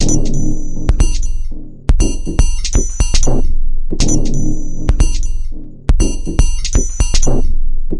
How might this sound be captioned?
Massive Loop -23
Another weird minimal drumloop created with Massive within Reaktor from Native Instruments. Mastered with several plugins within Wavelab.
120bpm, drumloop, experimental, loop, minimal